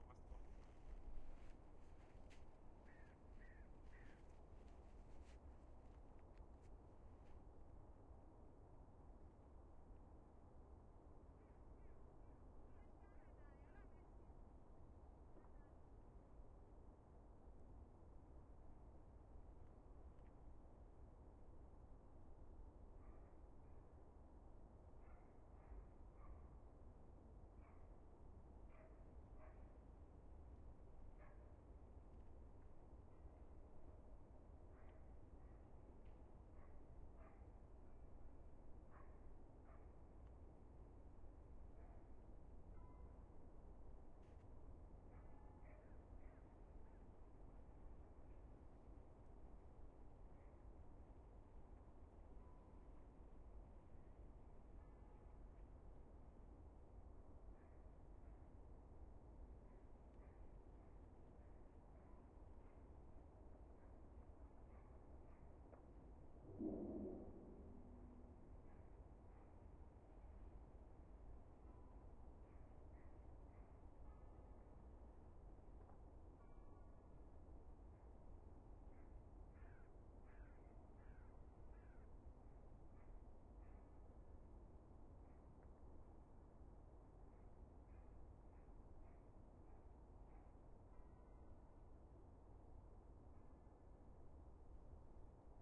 Atmo recording from winter in Helsinki, on ice, outside city approx. 600m from shore. Distant traffic, bird, dog, church bells. 2 X AKG MKH-60 -> Tascam HD-P2
atmo, background, big, distant, ext